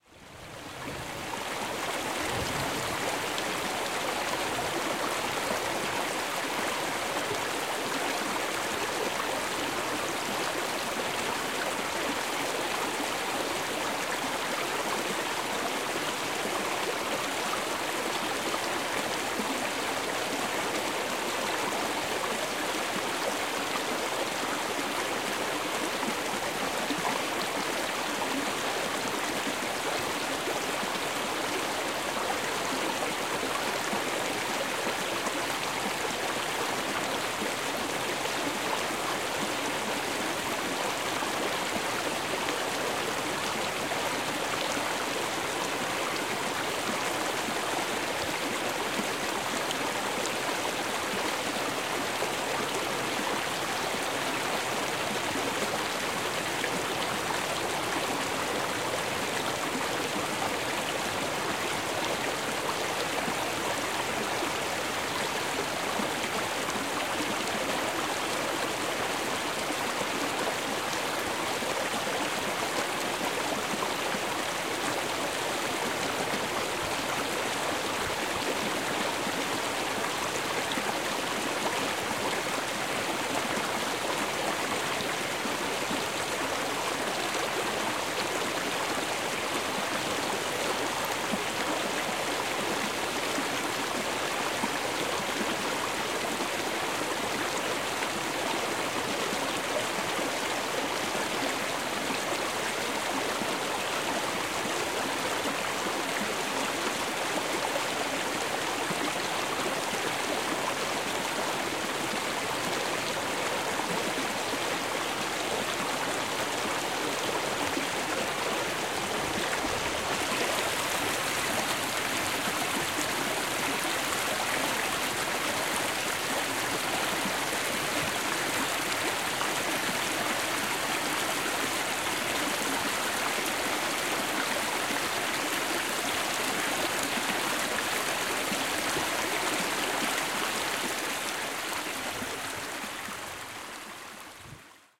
Rivier Chemin Traverse de Ligne Anse St Jean 11.05.17
11.05.2017: a small river alongside Chemin Traverse de Ligne Anse St Jean in Canada. Recorder zoom h4n.
Canada, water, stream, nature, field-recording